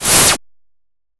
Attack Zound-68
A burst of noise suitable for clicks'n'cuts music. This sound was created using the Waldorf Attack VSTi within Cubase SX.
electronic, soundeffect